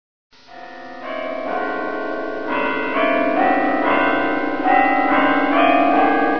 Devil's tritone
played as a series of rising chords. The keyboard is a DX
7. Abrupt ending of sample.